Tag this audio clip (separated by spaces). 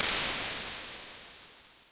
convolution reverb ir impulse response